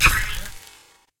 Laser Gun Ice Beam
Can be used as a plain laser, though it is meant to sound like more of a cold and icy shoot sound.
The base laser sound was created and edited using synths in cubase, then I mixed it with audio of a match being struck and with breaking ice to get the hiss-crackle sound.
shoot, ice